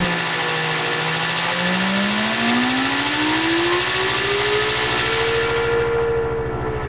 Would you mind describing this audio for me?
A rising pitch sound.
Trance, Pitch, Rising, Psytrance, FX, Dance